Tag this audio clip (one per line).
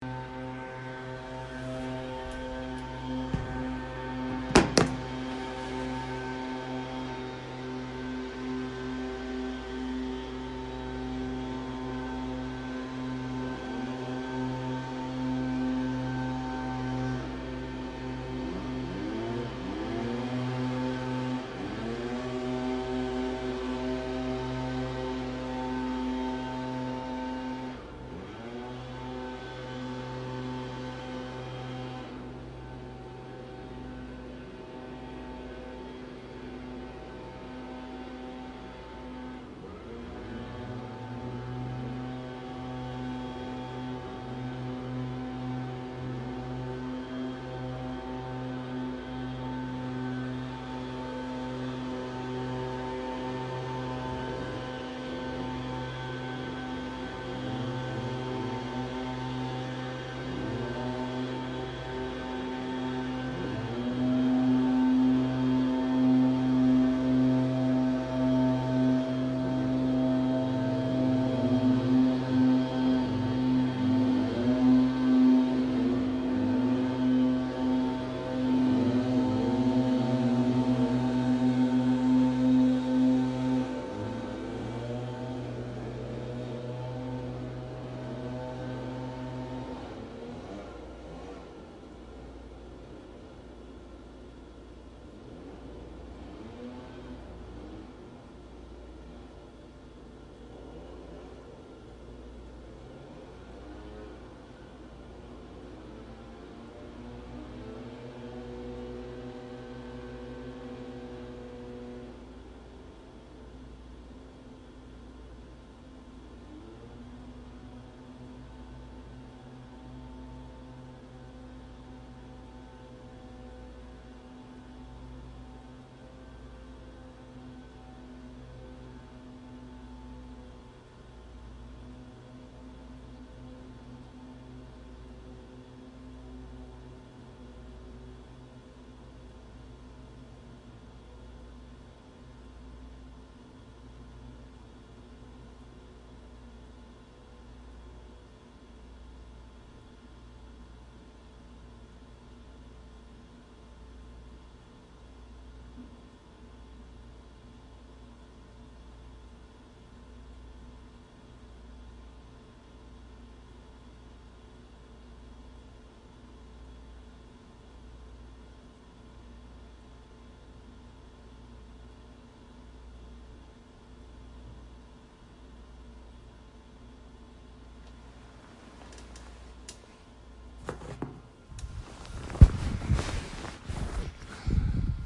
landscaping
engine
cutter
machines
motor
lawn
lawnmower